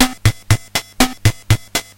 "8 beat" drum pattern from Yamaha PSS-170 keyboard
80s, 8beat, portasound, pss170, retro, yamaha